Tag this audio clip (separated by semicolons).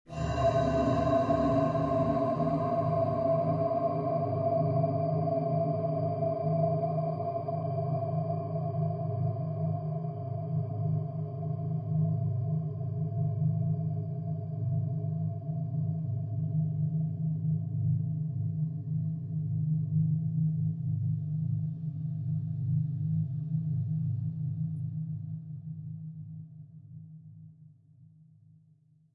ambient; reverb; atmosphere; dark; drone; experimental